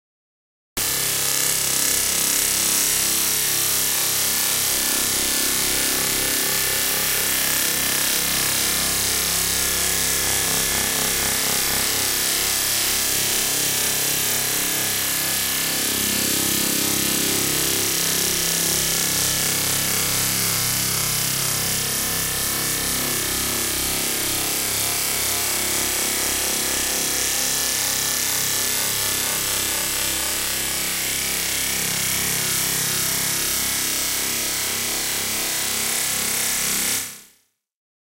biketire design 02
one of the designs made from a source recording of objects being pressed against a spinning bike tire.
Check out the rest of the pack for other sounds made from the bike tire source recording
abelton, bike, field-recording, processed-sound, reaper, rubber-scratch, scrape, SD702, s-layer, spinning, tire